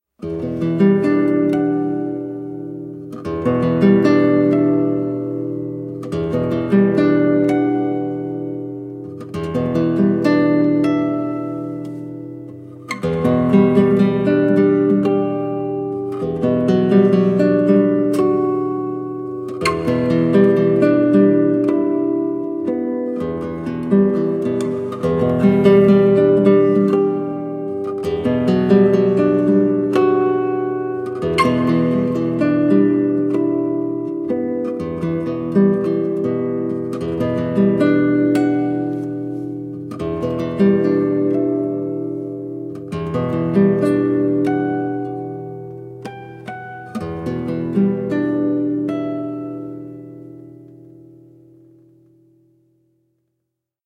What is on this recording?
Guitar Arpeggios - Star splendor
For my fairytale "Melody and the enchanted voice" I invented this sound to describe the beginning of the night and the stars that light up. It was recorded in a studio in 2010. I was playing on my classical guitar.
acoustic, arpeggios, chord, ethereal, firmament, flageolets, guitar, night, shining, splendor, stars